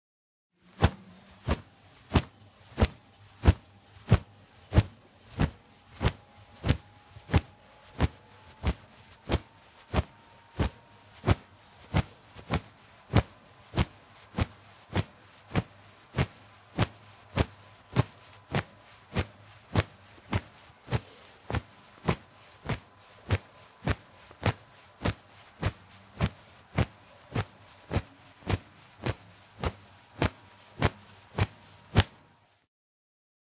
Flapping wings (foley)
It's a foley made with my underwear ;p
wings, flap, foley, flapping